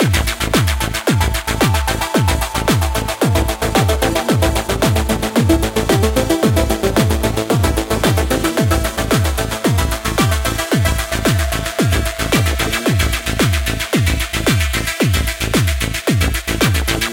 A looped and improved version of Slowspyk
dense, psytrance, loop